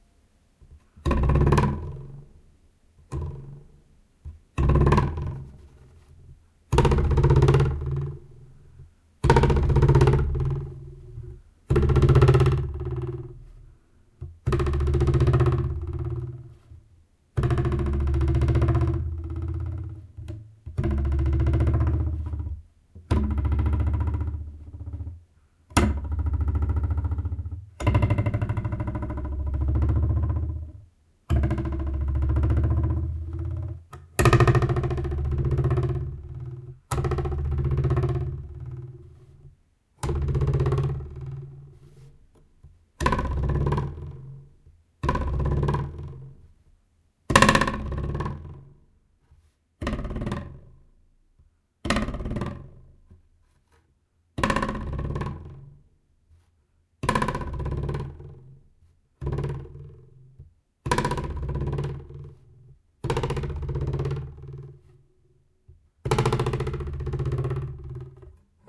Metal straightedge (trembling - vibrating)
Trembling / vibrating sound of a metal straightedge. Recorded with a Zoom H1.
Ruler, ZoomH1, Straightedge, Metal, Vibrating, Trembling